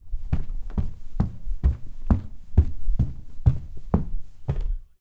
footsteps stairs fast
in-built mics on zoom h4n strapped to my leg pointing down as i walked, noise edited, nice clean recording. hope it is of use!